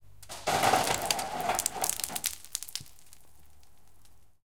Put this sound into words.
water - ice - throwing a handful of ice cubes onto an aluminum roof 03
Throwing a handful of ice cubes onto an aluminum roof so that they slide down and fall onto concrete.